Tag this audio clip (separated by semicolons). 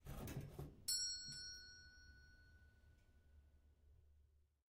Pull; Store